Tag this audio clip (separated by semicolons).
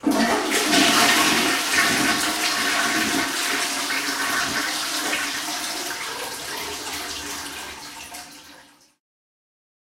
cistern; toilet; water